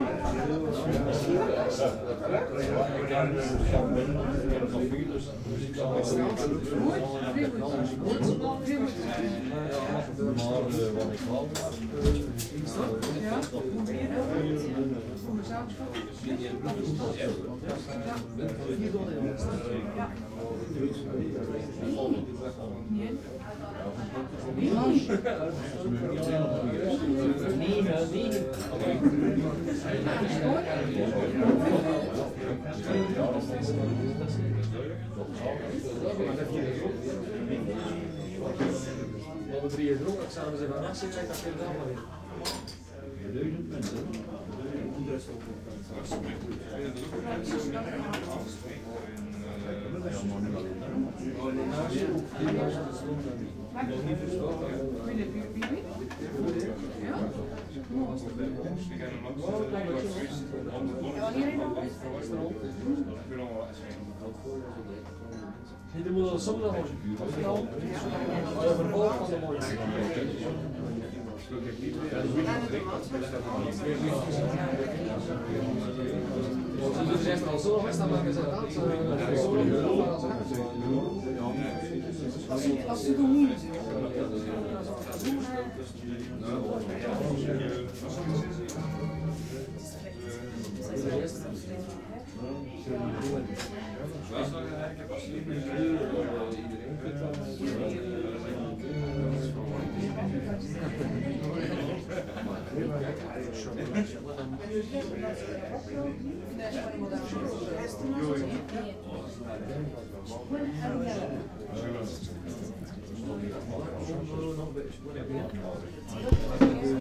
Inside the famous T'Brugs Beertje in Bruges, the sounds of a busy bar